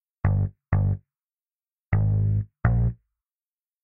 125 Bb tech house bass
125 bpm tech house bass loop
bass-loop, tech-house, 125-bpm